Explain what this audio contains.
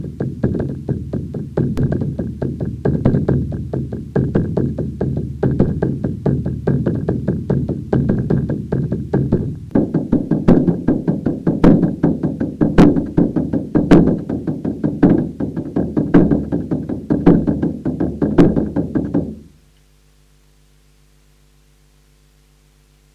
short rhytm and drum bits. Good to have in your toolbox.